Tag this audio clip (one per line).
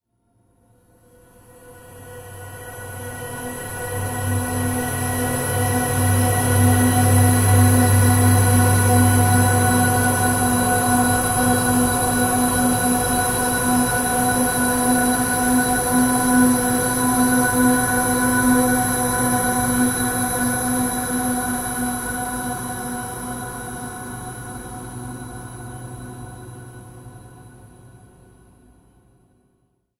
soundscape
space
divine
multisample